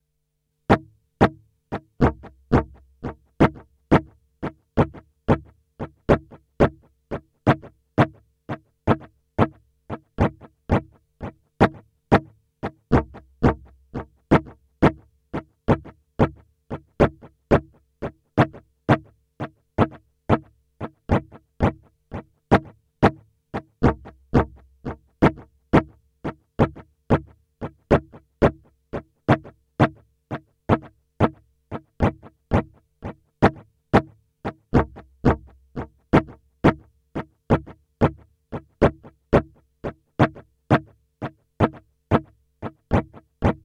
16 Bar Guitar Snare with Delay at 88bpm
(use PO-12 018)
operator, drum-loop, pocket, ibanez, drum, beat, organ, rhythm, distortion, engineering, maneki, clean, neko, teenage, Monday, 88bpm, snare, drums, mxr, guitar, cheap, rg2620, po-12, percussion-loop, machine, loop